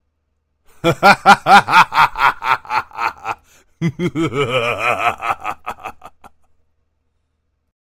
Maniacal Laugh 1
Varying Maniacal Laughter
crazy, laugh, maniac